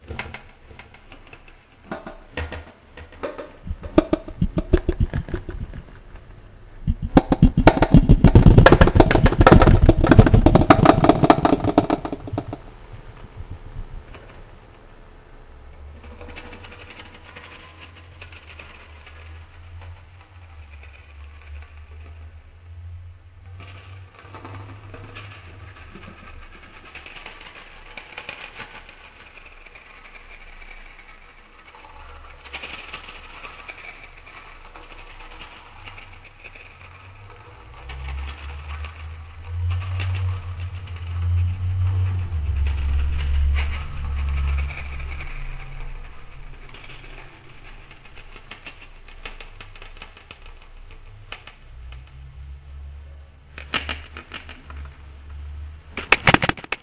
Scrapping and Bending Cheap Alu PROCESSED REVERBED
The same Sample with some Reverb added.
Makes it sound a bit more eerie, maybe just funny.
<Scrapping and Bending Cheap Alu.
Exactly, its the cap of a cheap alluminium Box,
Scrapped over with a metallic Brush full of Cat's Hair. lol
Pure spontaneous improvisation>
alu, cheap, bending